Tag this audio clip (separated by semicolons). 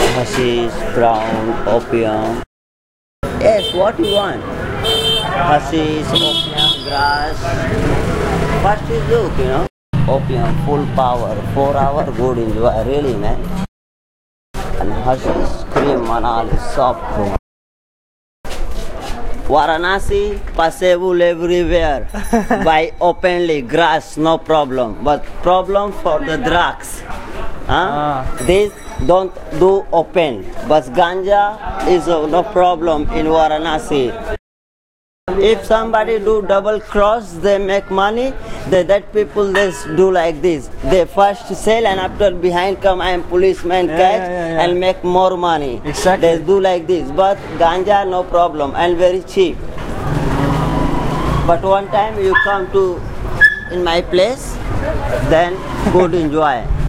Alley; Hashish; travellesque; Varanasi; Drugs; Pusher; India; Cocaine; Marijuana; field-recording; Opium; Marketplace